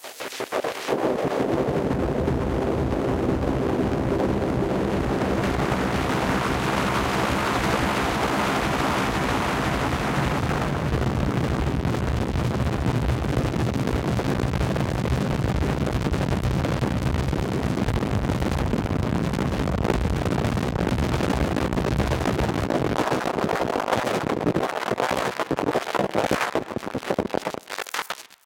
Analog Sandstorm was made with a Triton, and 2 Electrix effect processors, the MoFX and the Filter Factory. Recorded in Live, through UAD plugins, the Fairchild emulator,the 88RS Channel Strip, and the 1073 EQ. I then edited up the results and layed these in Kontakt to run into Gating FX.
Distortion, Analog-Filter, Noise